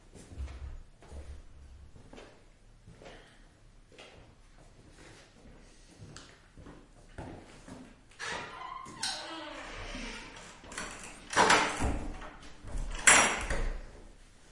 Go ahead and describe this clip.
WOOD DOOR 5
closed, door, open, slam, wood, wooden